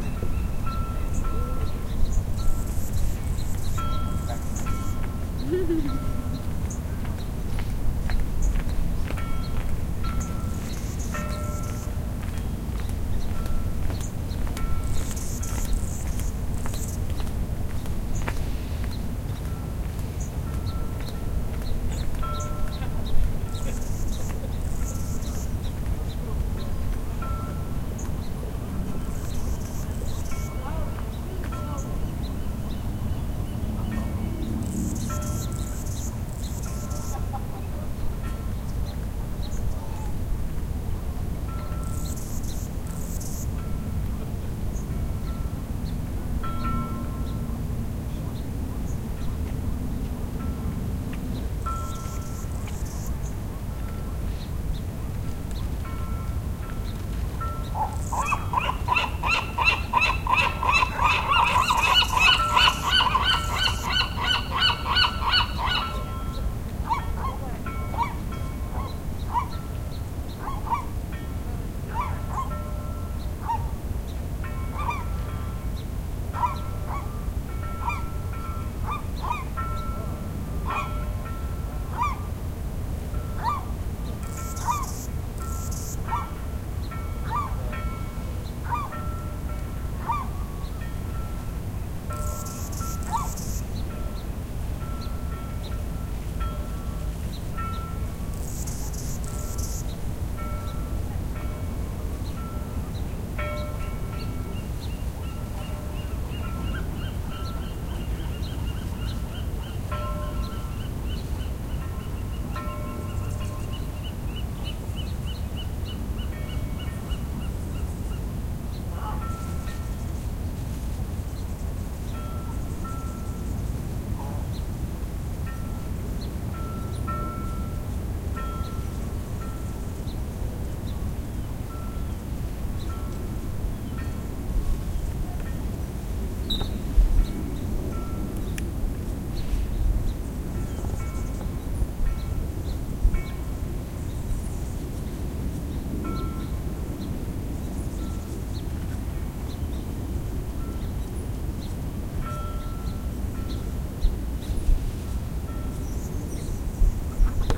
A stereo field recording of Alcatraz island which has a bouy on the L side and bugs/seagull on the R side